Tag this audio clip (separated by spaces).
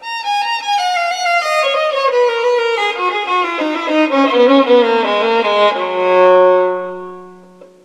Baroque Phrasing Violin Trills